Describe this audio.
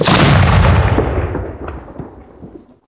explosion big 01
Made with fireworks
bang, boom, destroy, explosion, firework, fire-works, fireworks, long, wide